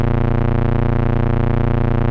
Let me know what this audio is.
A basic saw waveform from my Micromoog with 50% of two octave doubling applied. Set the root note to A#2 -14 in your favorite sampler.